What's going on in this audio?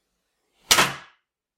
Metal Lid Close
Closing a metal lid of an in-wall fuse-box.